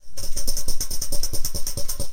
Toy plastic tamborine recorded with Behringer B1 through UB802 to Reaper and edited in Wavosaur. Loop it or die.